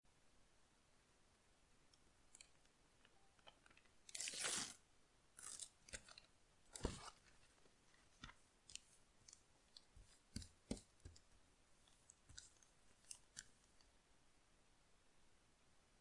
sonido cinta
sonido de cinta desgarrandose y luego siendo utilizada
efects, sound